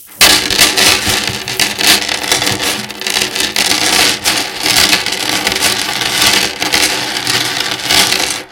Mysounds LG-FR Marcel -metal chain
CityRings, France, Rennes